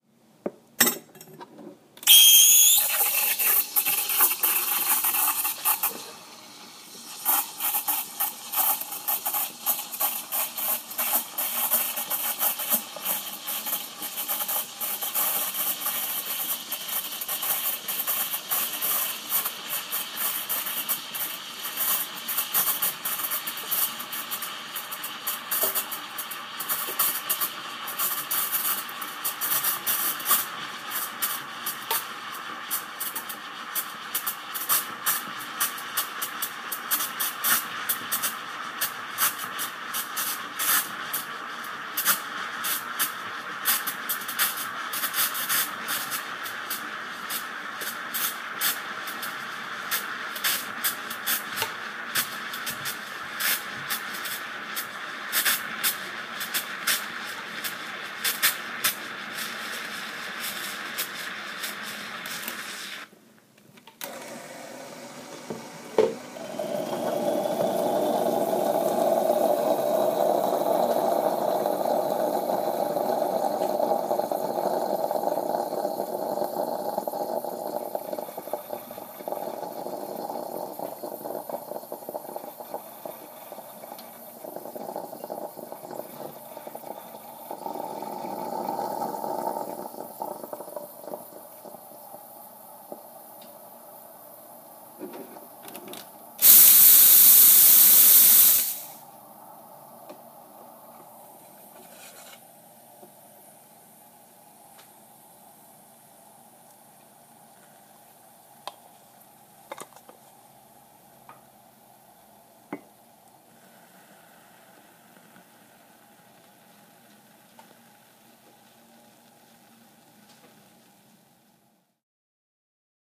Steaming milk on a consumer-grade latte maker
Milk steamed in a ceramic mug, espresso shot pulled, pouring coffee. iPhone 6 using the Voice Memos app.
foam, milk, kitchen, breakfast, pressure, glass, morning, steel, pouring, pour, plastic, espresso, latte, steam